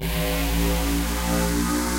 Square wave rising from A to slightly sharp with some modulation thrown in rendered in Cooldedit 96. Processed with various transforms including, distortions, delays, reverbs, reverses, flangers, envelope filters, etc.
digital, square, synth, synthesis, synthesizer, synthetic, wave